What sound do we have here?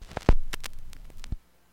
The sound of a stylus hitting the surface of a record, and then fitting into the groove.
noise, record, needle-drop, analog